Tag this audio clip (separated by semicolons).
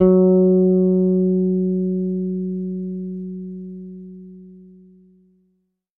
bass; electric; guitar; multisample